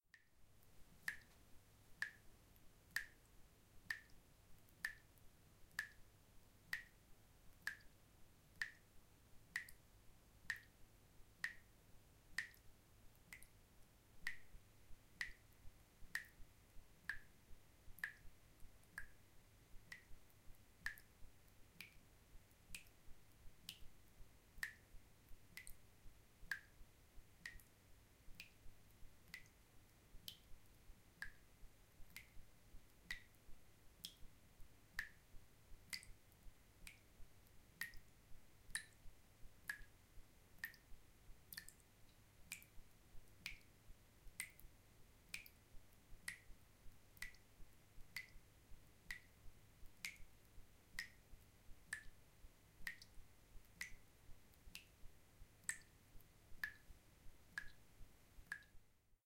Raw audio of dripping in a sink.
An example of how you might credit is by putting this in the description/credits:
And for more awesome sounds, do please check out my sound libraries or SFX store.
The sound was recorded using a "H1 Zoom recorder" on 5th April 2016.
Random Trivia: This "Dripping" pack marks the anniversary of my first uploaded sound.